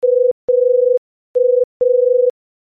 Sounds like a phone ringing.
synthesized, ring, tone, telephone, phone, ringing